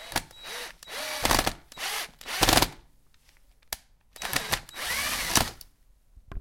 03-2 Drill with screw
Drill with screw
Czech, Panska